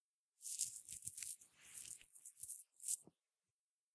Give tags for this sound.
collar
jewellery
jewelry
necklace

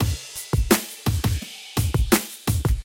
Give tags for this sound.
85
85BPM
Drum
Loop

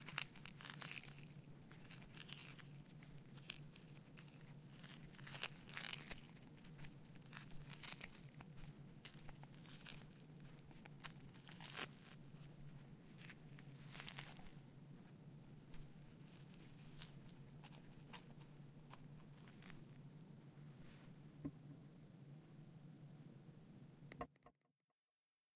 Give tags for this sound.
adventure
walking
woods

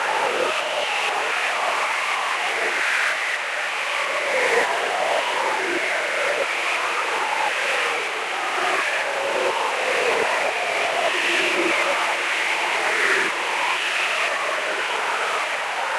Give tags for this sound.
Energy
Magic
Soul

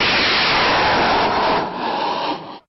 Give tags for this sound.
abv
army
breacher
breaching
c4
field
launcher
launching
military
mine
rocket
technology
us

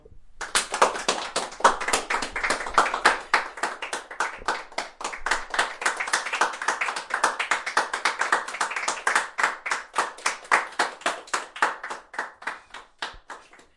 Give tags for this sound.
clap
clapping
crowd

